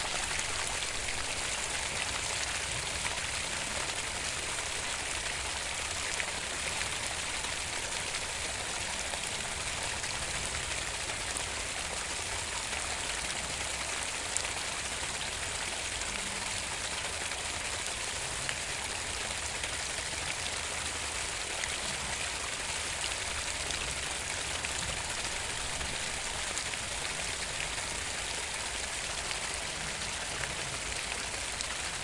Waterfall from wastewater pipe on the riverside near Leningradsky bridge.
Recorded 2012-10-13.
XT-stereo
city, drain, flow, noise, pipe, sewage, sewer, tube, wastewater, water, water-flow, water-pipe, waterfall